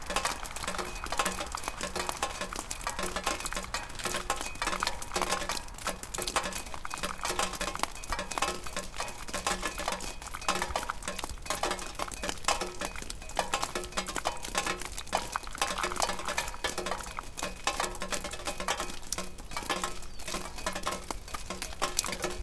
snow-melt on a shed roof, drips from gutter falling onto old rusting car parts.
recorded at kyrkö mosse, an old car graveyard in the forest, near ryd, sweden
drip, drips, field-recording, metal, rhythmic, water